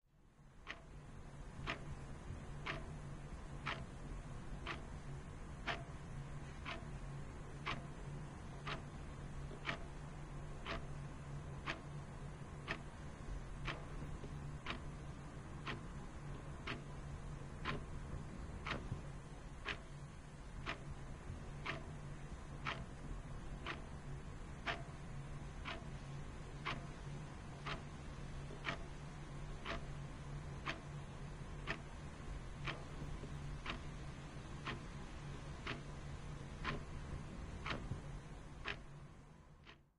house ambiance with clock tik tak record by Blue spark